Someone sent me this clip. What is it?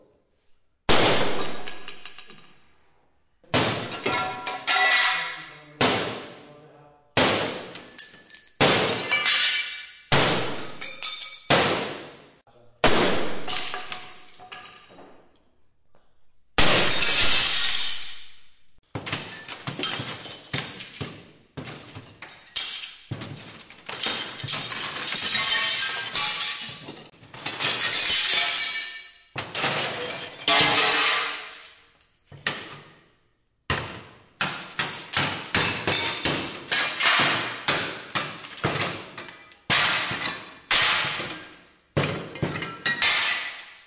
Break Mirror001
44.1/16bit, Breaks huge mirrors.